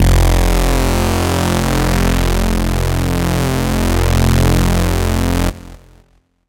SemiQ leads 11.
This sound belongs to a mini pack sounds could be used for rave or nuerofunk genres
filter, leads, osc, s